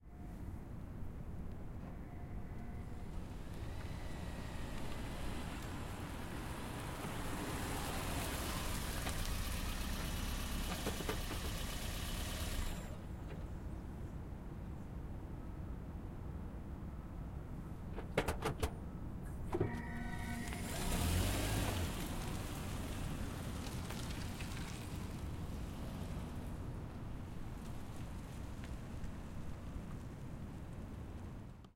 Exterior Prius In Stop Away w turn
Toyota Prius C with some good distant turning perspective.
Prius, Exterior